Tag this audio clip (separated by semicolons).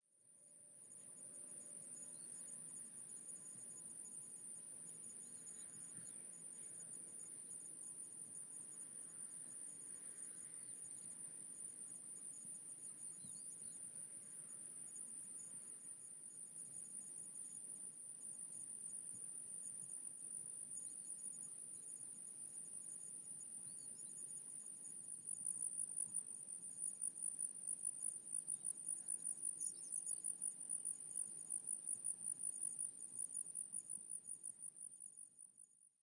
outdoors; crickets; field-recording